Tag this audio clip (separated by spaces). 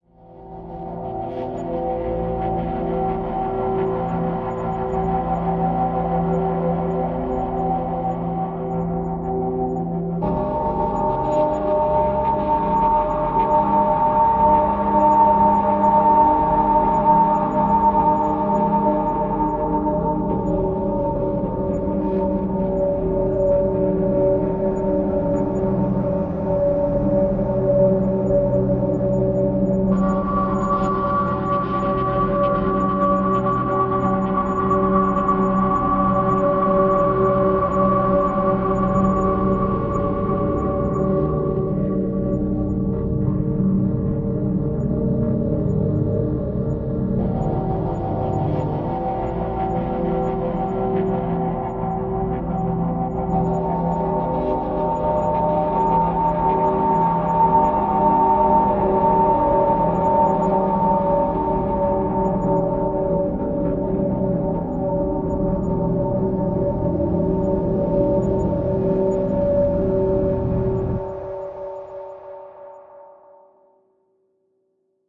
Ambient Atmosphere Cinematic commercial Drone Drums Loop Looping Pad Piano Sound-Design synth